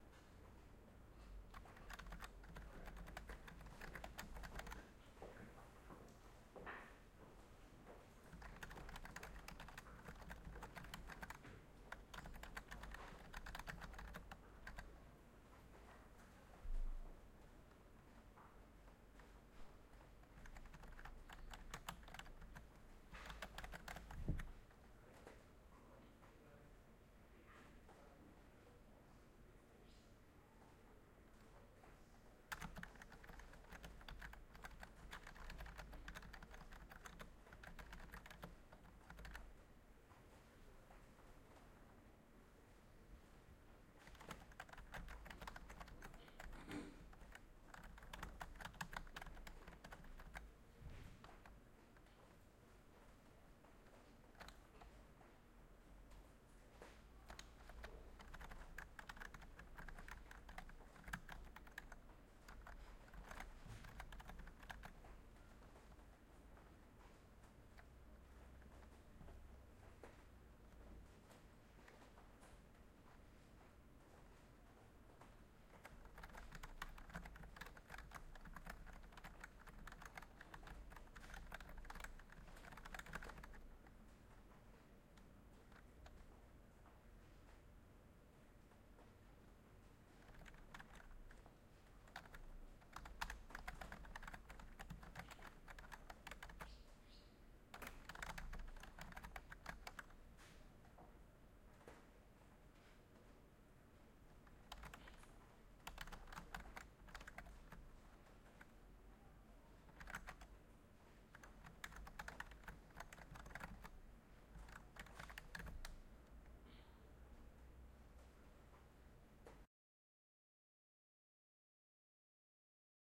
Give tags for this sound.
Library; OWI; Typing; Room

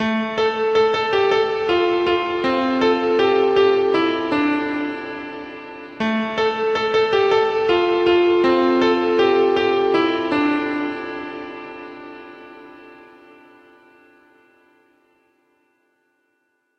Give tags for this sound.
Electronic; Piano; Techno; Trance

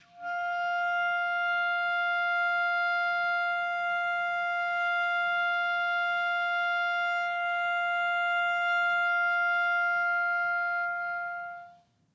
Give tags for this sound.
multisample; esharp5; vsco-2; clarinet; long-sustain; single-note; midi-note-77; midi-velocity-62; woodwinds

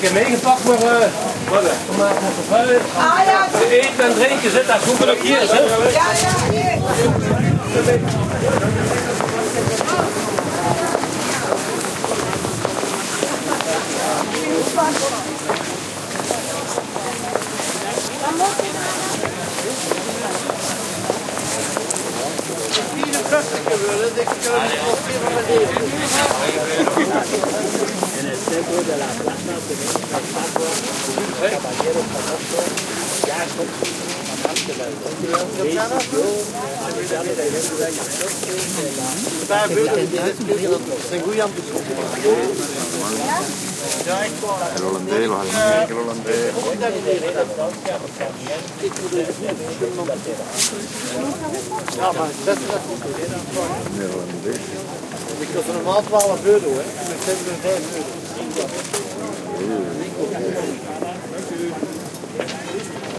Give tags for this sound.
ambiance; city; dutch; female; field-recording; gent; male; market; voice

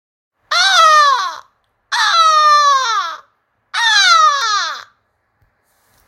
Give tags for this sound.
bird; Seagull; beach

